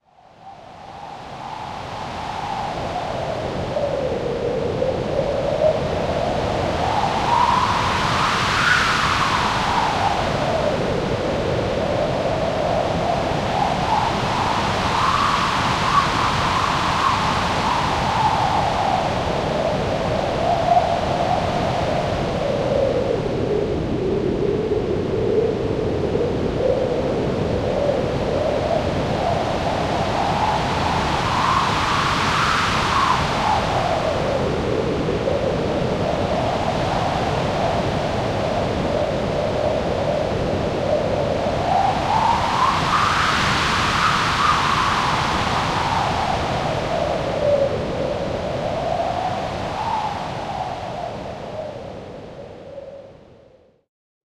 White noise being modulated by a lowpass cutoff and resonance mixed with delay and reverb. No samples used.